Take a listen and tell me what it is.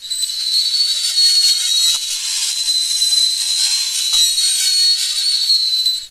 This sound of two pieces of glass friction against each other is torture for many, at least pain or unbearable for sensitive ears. Played back with powerful treble speakers , you would enjoy all shouting 'eeeeeeeeeee....stop it!'